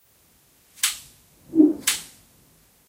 65394 uair01 mysterious-signal-08-night-microphone Isolated
phenomena, spooky, voice